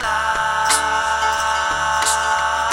A quick cut of myself singing "Lifeeeeeee" in harmony for an original song.
From the Album, "Daisy Chain Hurricane":